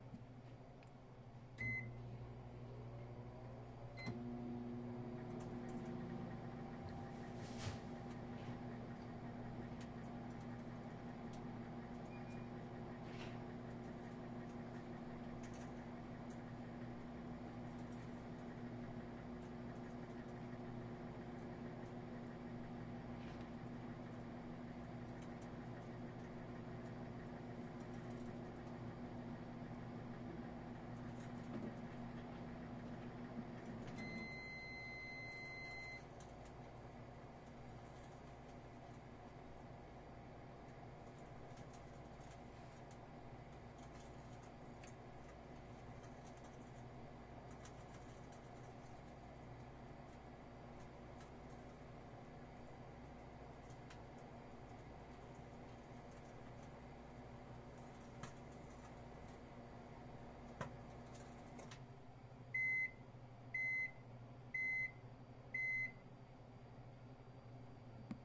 Recording of two microwaves started together. Recorded on Zoom H2.
beeping, microwave, time